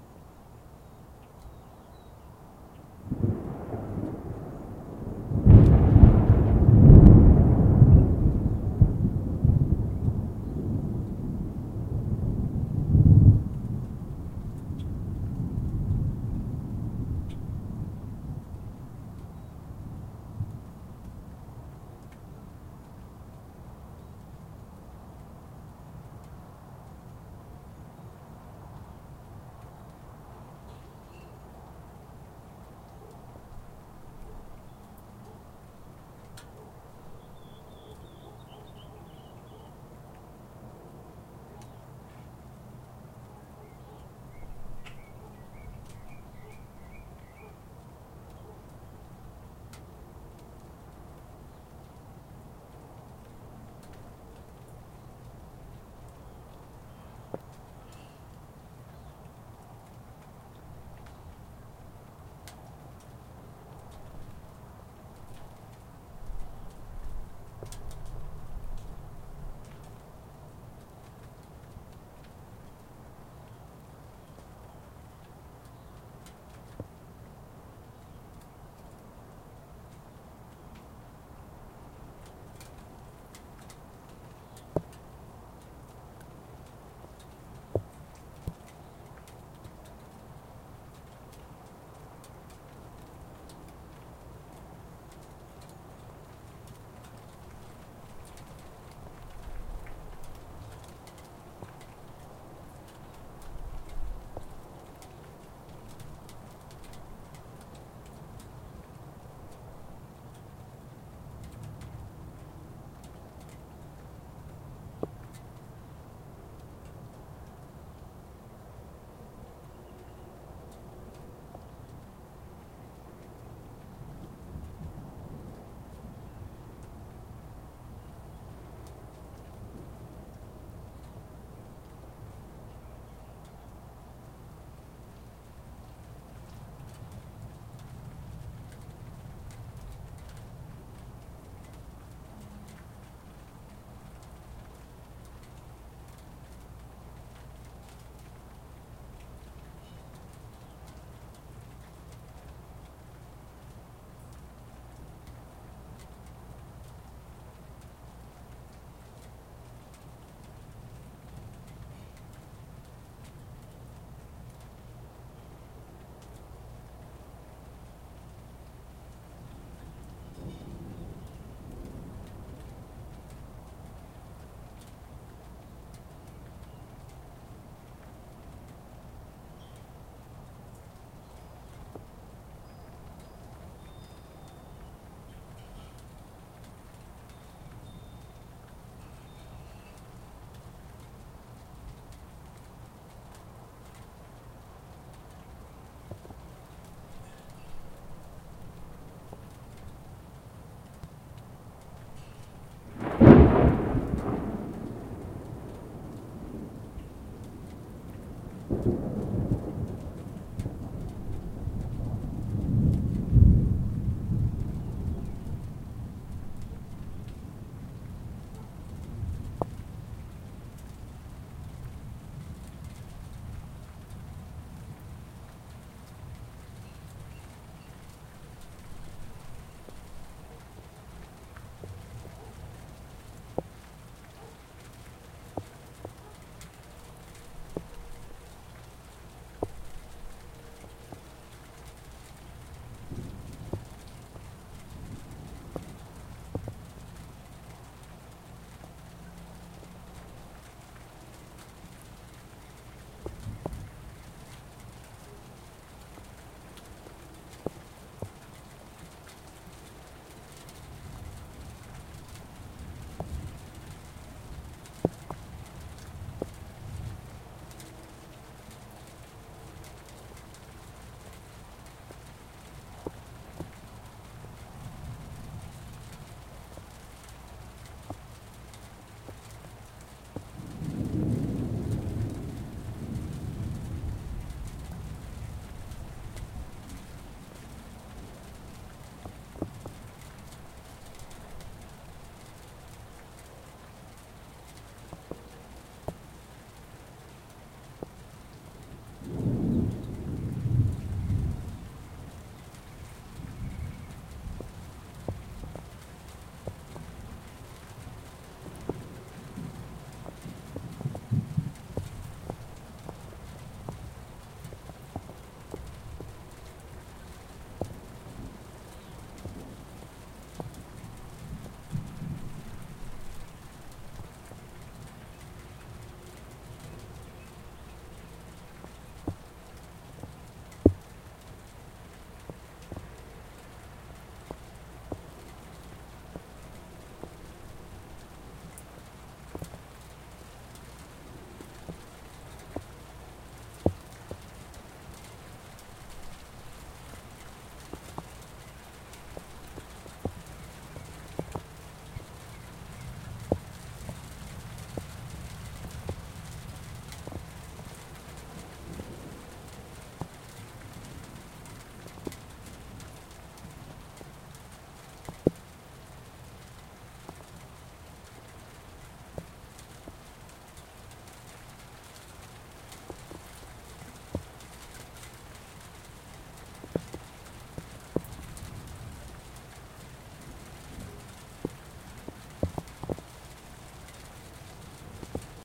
More much needed thunderstorms recorded with my laptop and a USB microphone.
field-recording, rain, storm, thunder